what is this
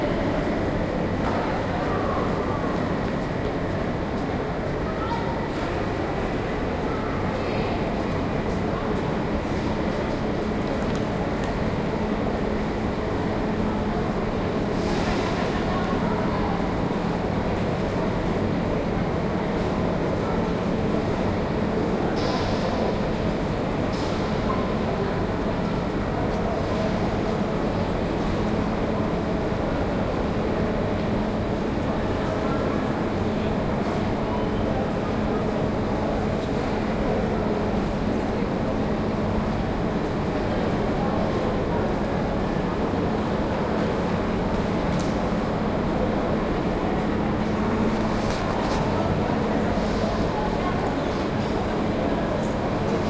train station busy
paris, station, train